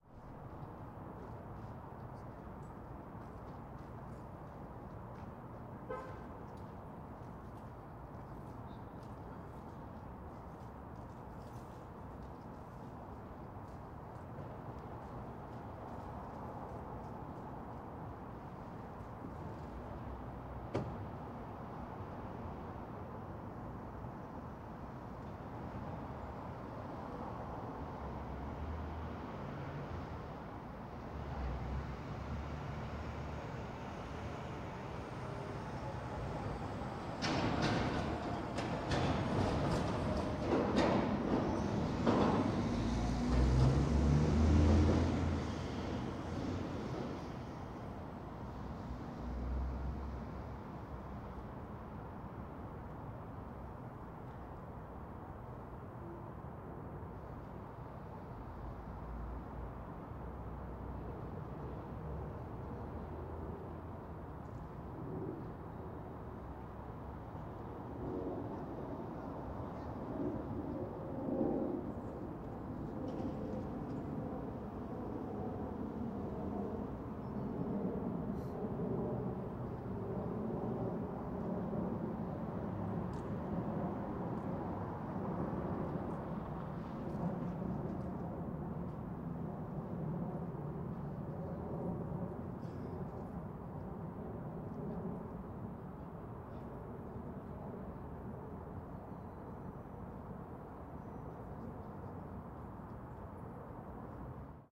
sh seattle warehouse district alley
Ambient sound recorded in the warehouse district, Seattle. At one point, a truck goes over a railroad track and makes a nice, metallic clang. Sennheiser MKH-416 microphone in Rycote zeppelin, Sound Devices 442 mixer, Edirol R4-Pro hard disk recorder.
seattle, urban, alley, warehouse-district, industrial-district, ambience